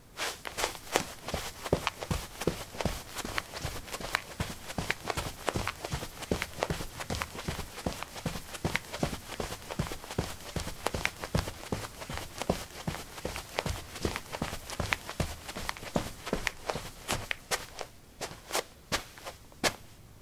carpet 13c sportshoes run
Running on carpet: sport shoes. Recorded with a ZOOM H2 in a basement of a house, normalized with Audacity.
footstep, steps, footsteps